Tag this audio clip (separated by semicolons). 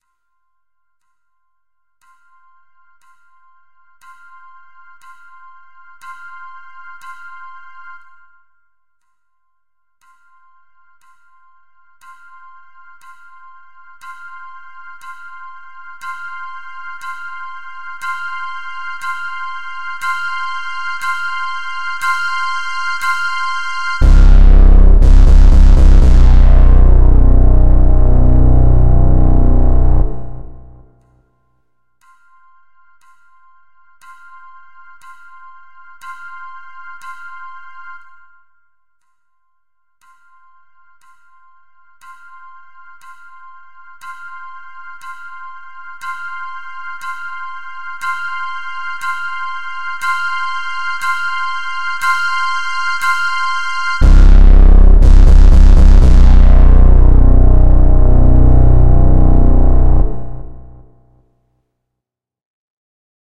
Music-Beds; Tension